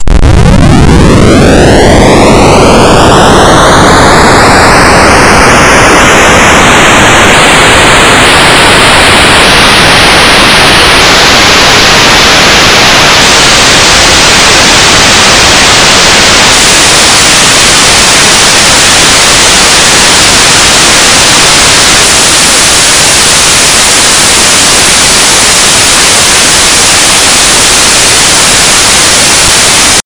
31 LFClipNoise FreqSweep
This kind of noise randomly generates the values -1 or +1 at a given rate per second. This number is the frequency. In this example the frequency sweeps up. The algorithm for this noise was created two years ago by myself in C++, as an imitation of noise generators in SuperCollider 2. The Frequency sweep algorithm didn't actually succeed that well.
clip, clipnoise, digital, frequency, noise